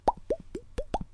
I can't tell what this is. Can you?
Human made sound of bubbles